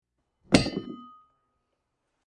Iron Bar Drop onto Wood & Straw Floor
Needed a really good bar drop for a film we where doing. This is a nice combo of a dead iron bar drop to a sawdust and straw wood floor with the addition of a metal bar dropped on wood. A little drop of a light bulb to finish the sound off. One drop not repeated.
Created by Paul Villeneuve and Dominic Kaiser / Stories By The River
steel, wood, iron, metallic, drop, bar, metal, floor, rod